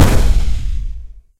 4th cannon-like boom. Made in Audacity.